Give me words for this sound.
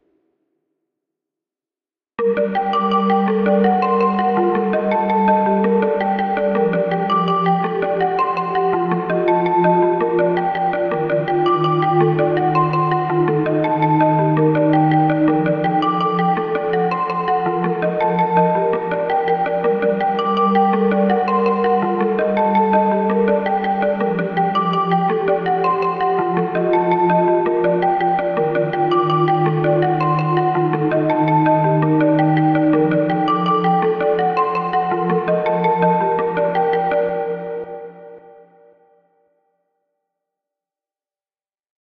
Trap rap hiphop vibe loop
loop, hop, hiphop, hip, trap, bpm, beat, arpeggiated